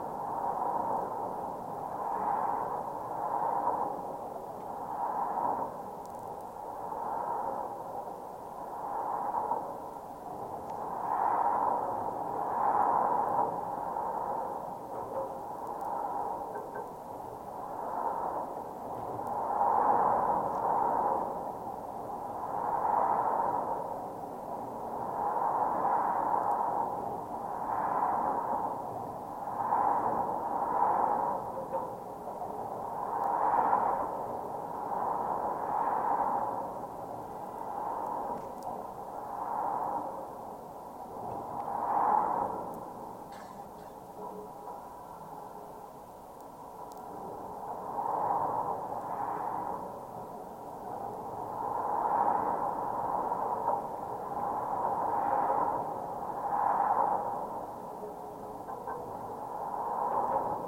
BB 0101 catenary
Contact mic recording of the Brooklyn Bridge in New York City, NY, USA. This is the inner southwest catenary cable on the Brooklyn side, sampled from the pedestrian walkway. Recorded April 11, 2011 using a Sony PCM-D50 recorder with Schertler DYN-E-SET wired mic attached to the cable with putty.
contact-microphone, field-recording, PCM-D50, bridge, contact-mic, wikiGong, Brooklyn-Bridge, mic, steel, DYN-E-SET, Schertler, Sony, cable, Brooklyn-side, contact